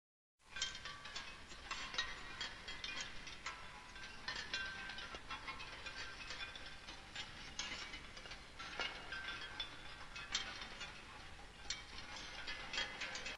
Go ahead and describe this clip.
Wind Chime 2
sound, wind, chime